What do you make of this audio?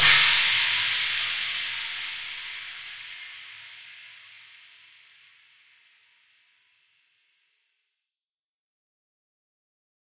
CymbalLong FromCoinsSplatReverbedPlusAboxCym
This was for a dare, not expected to be useful (see Dare-48 in the forums). The recorded sound here was a handful of coins -- carefully reverberated and enveloped. The mixed sound was a cymbal sound I created in Analog Box 2. A lot of editing was done in Cool Edit Pro. Recording was done with Zoom H4n.